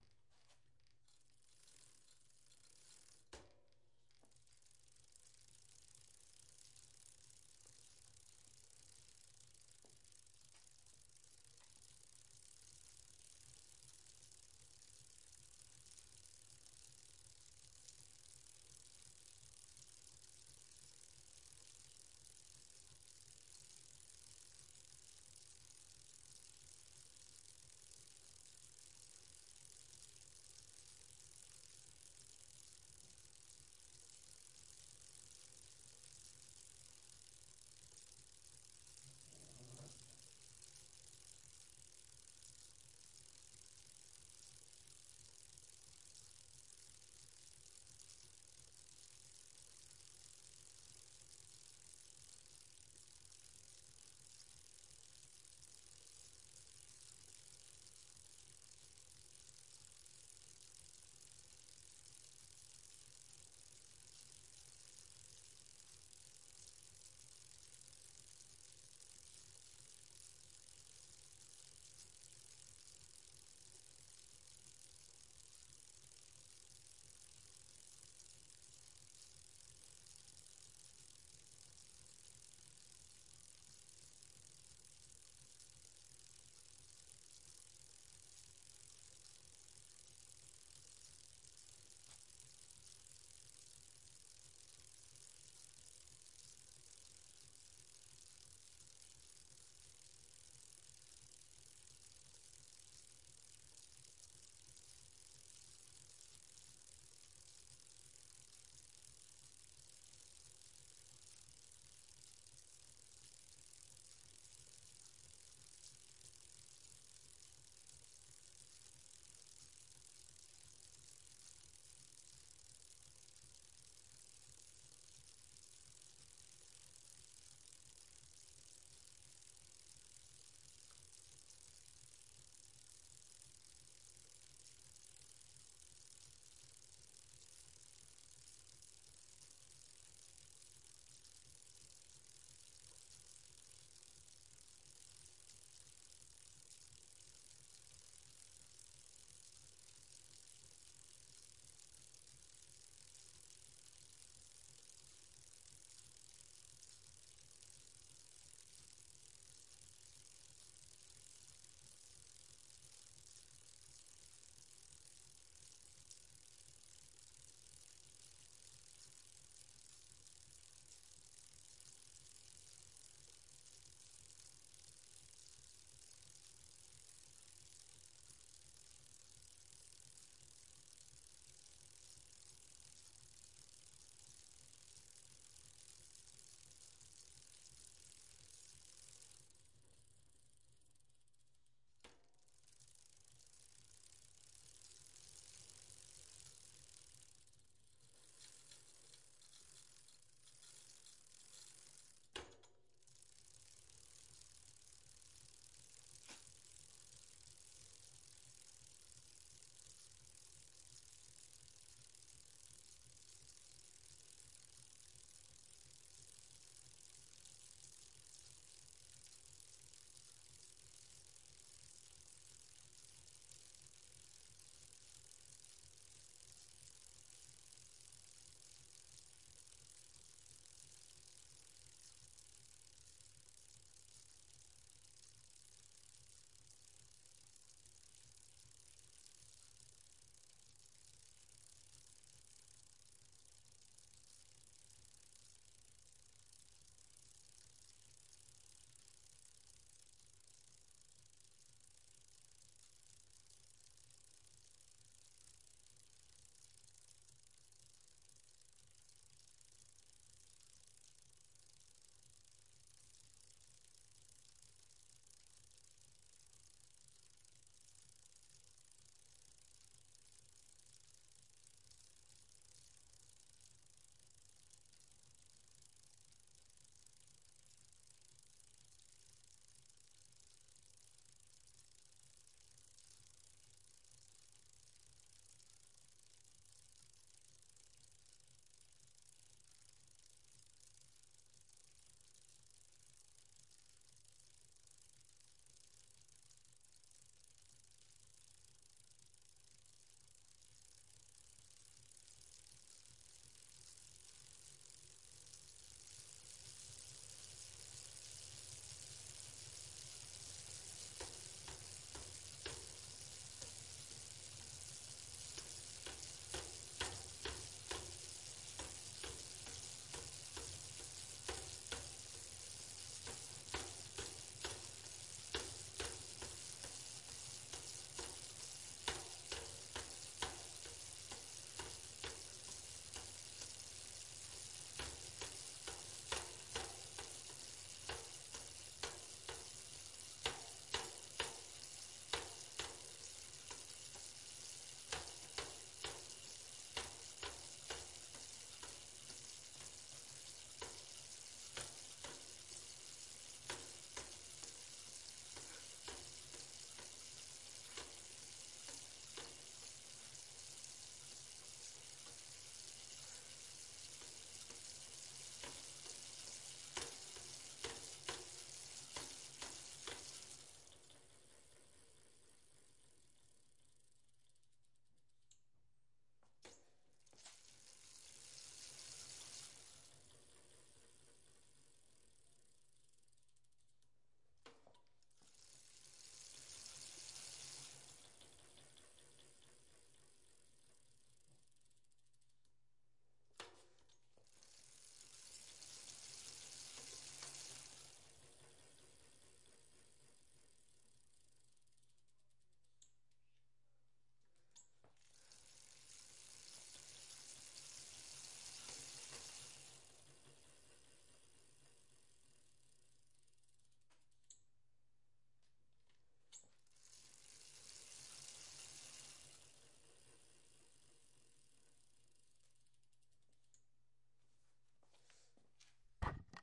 bike sounds
Recorded in my garage, varying speeds
bike, bicycle, pedaling, wheel